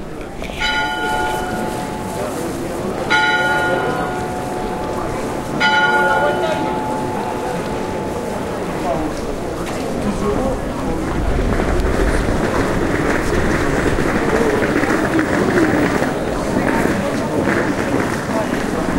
clock strikes three near the National Museum in Brussels, noise of wheeled suitcase being dragged on paved floor. Olympus LS10 internal mics
ambiance, bell, brussels, field-recording, journey, travel